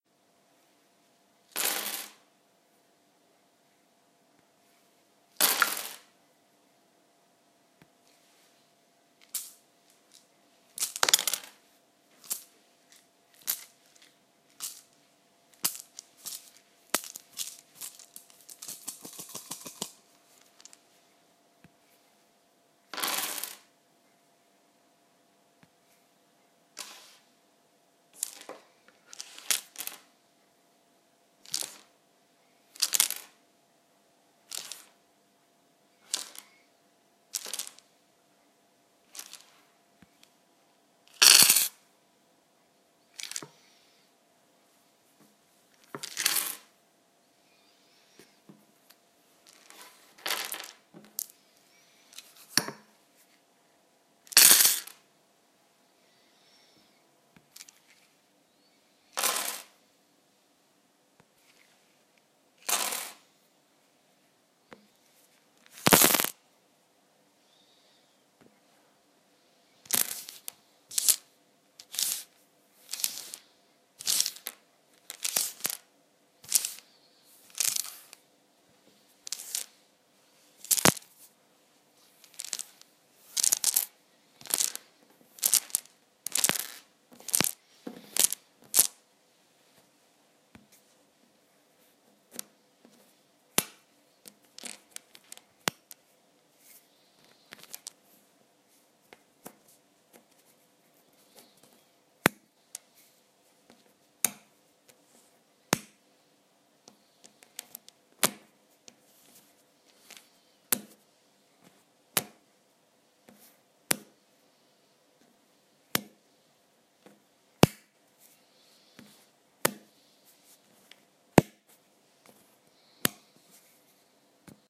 A collection of sounds made by manipulating small wooden scrabble tiles in various ways to get effects for a game.